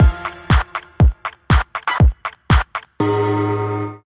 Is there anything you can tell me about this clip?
Loop Fighting Evil Mummies 04
A music loop to be used in fast paced games with tons of action for creating an adrenaline rush and somewhat adaptive musical experience.
battle, game, gamedev, gamedeveloping, games, gaming, indiedev, indiegamedev, loop, music, music-loop, victory, videogame, Video-Game, videogames, war